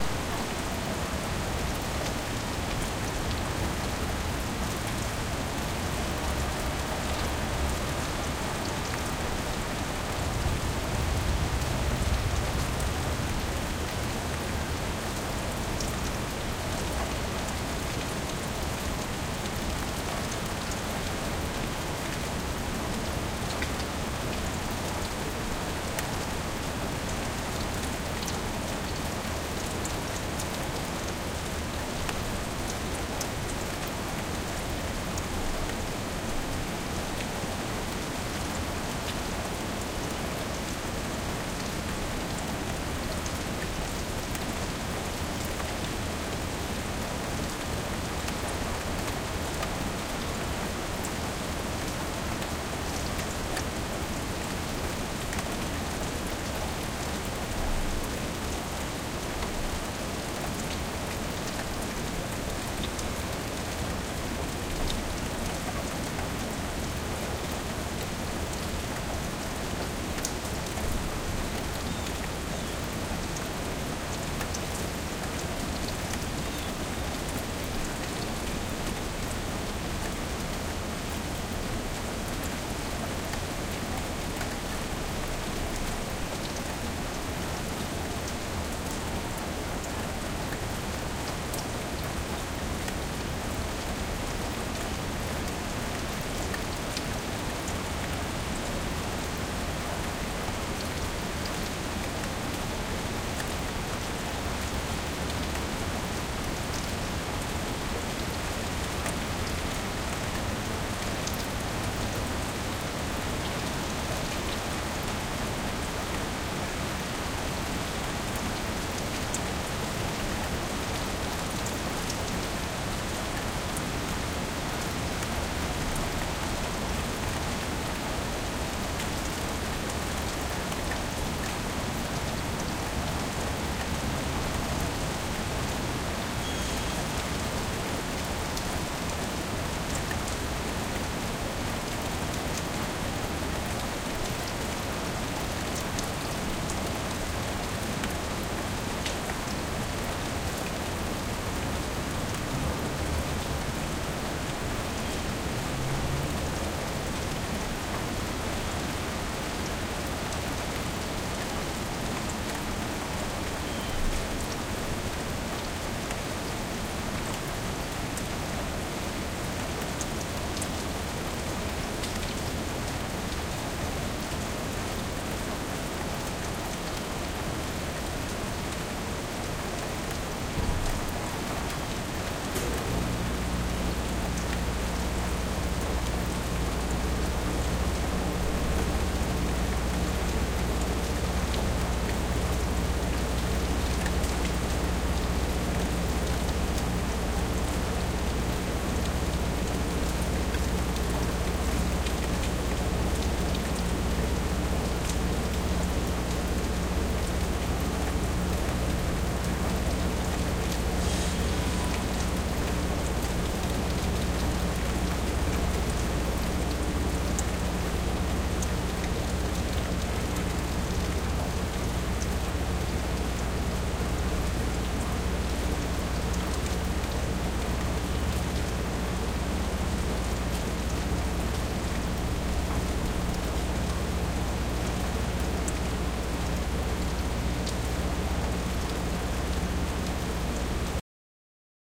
Urban Rain 03
Light rain recorded from an upstairs window facing an alley in an urban city environment.
urban, weather, field-recording, rain, storm